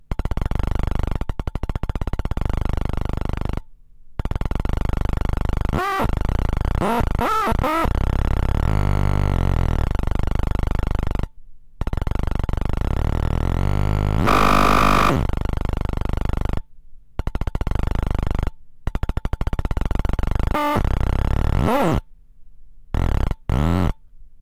ticking cracklebox
I was just goofing around on my crackle-box, connected to a contact
microphone. Contact mic went into my mixer and after that straight to
my computer. Used "Chainer" to run the signal through some vst-plugins (DFX geometer and Murder).
This part is a more rhythmic, the box produces a nice ticking, clicking and popping sound.